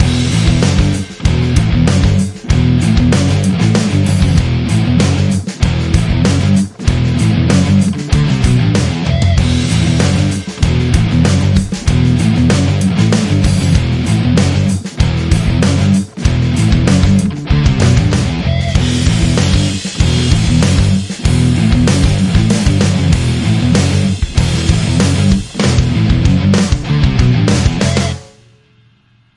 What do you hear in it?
E minor 96bpm
96bpm, E, guitar, minor